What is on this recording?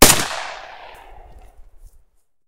Firing Semiauto Rifle 1
Field recording of a rifle # 1.
Firearm, firing, FX, gun, rifle, shooting, shot, weapon